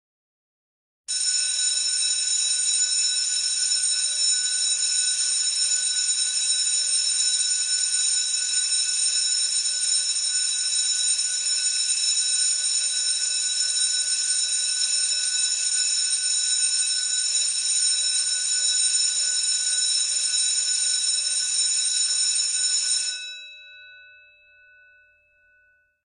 School bell "Escola Basica Gualtar" Portugal